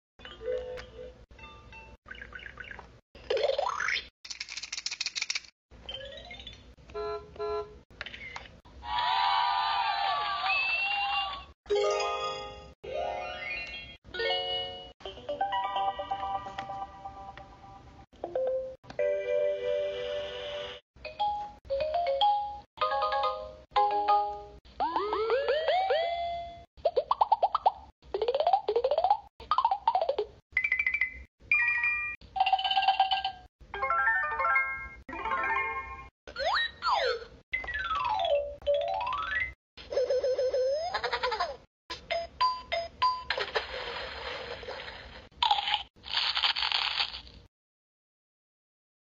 Various sound effects from children's toys edited together. All are on a low quality speaker, but are usually unique. All spoken word sounds as well as specific things like laughs or animal sounds are in different sounds. They range from real sounds like cowbells and car horns to blings and ascending synth sounding noises for a correct answer. (some tags describe one or two sounds in a long list, so listen to all of them if you're looking for something specific)